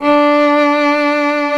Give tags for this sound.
arco; keman; violin

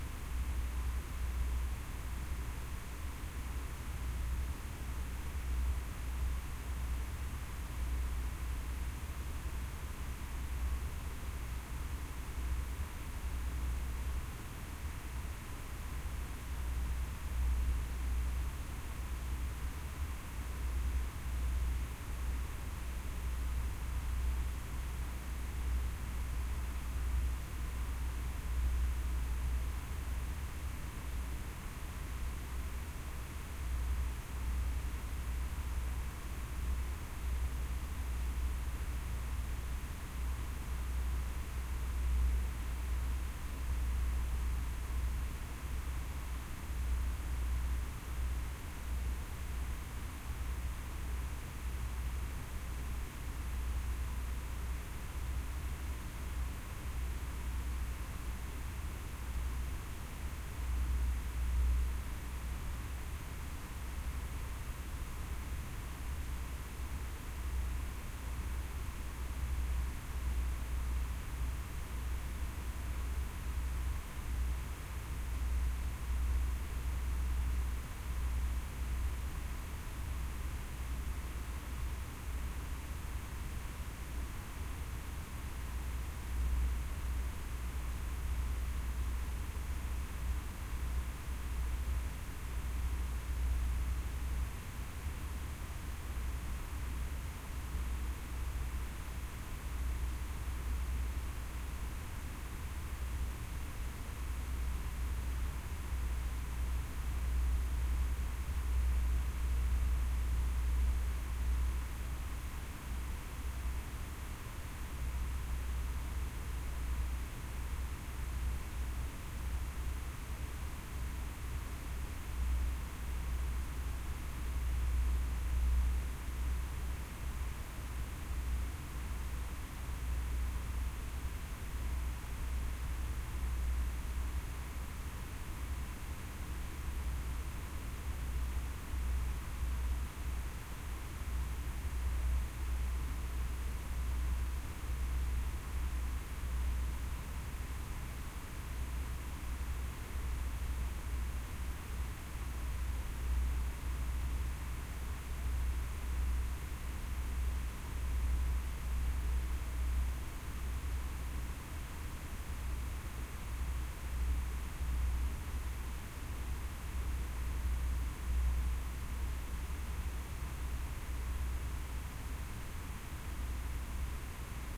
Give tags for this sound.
abstract; atmosphere; background; city; noise

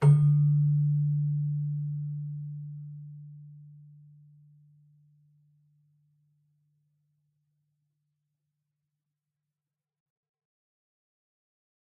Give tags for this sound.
bell; celesta; chimes; keyboard

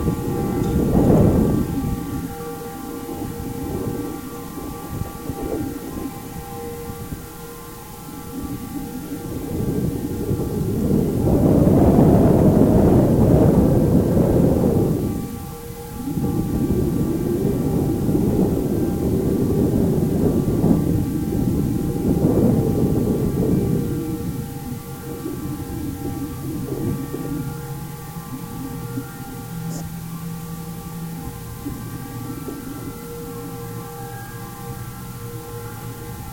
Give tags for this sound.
bronze; capitol; contact; contact-mic; contact-microphone; Denver; DYN-E-SET; field-recording; Liberty-bell; mic; normalized; PCM-D50; Schertler; sculpture; wikiGong

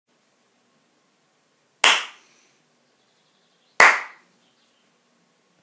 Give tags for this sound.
agaxly; clap; hand; loud

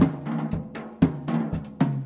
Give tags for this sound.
drum; loop

